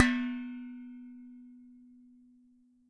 Hitting a large pot lid
bang, hit, kitchen, lid, metal, pot